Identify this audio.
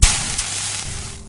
droplet sizzle
Water drop hitting a hot plate.
water-drop, boil, sizzle